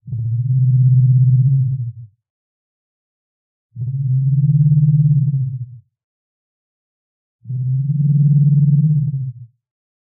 Large herbivorous creature of grasslands (female, quiet) [3 PITCHES]
A sound for a large herbivorous creature (some kind of dinosaur) that dwells in grasslands, for Thrive the game. Made from scratch using Harmor, Vocodex, and some other plugins from Fl Studio 10.
It has some reverb, resonance, vibrato and tremolo for more realism.
vocalization, animal, creature, dinosaur, growl